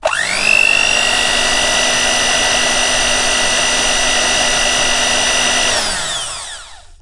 BEAT04MT
A sample of my Sunbeam Beatermix Pro 320 Watt electric beater at low speed setting #4. Recorded on 2 tracks in "The Closet" using a Rode NT1A and a Rode NT3 mic, mixed to stereo and processed through a multi band limiter.